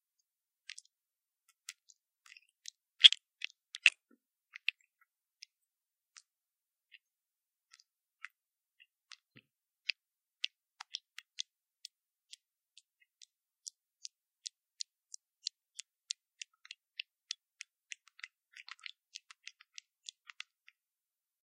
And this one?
Wet sounds, they could be many things ... some innocent, some not.
wetness, fluid, slurp, damp, slick